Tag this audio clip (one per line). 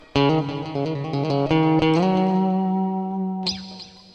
electric-guitar
musical-instruments